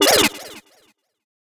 Arpeggiated Bleep With Delay
This was created by me using NI Massive within Renoise.